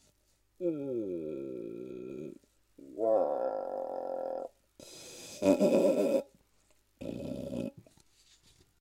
this sound is made using something in my kitchen, one way or another